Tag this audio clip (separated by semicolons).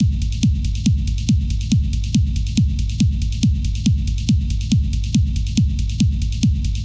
dance; electronica; kick; loop; processed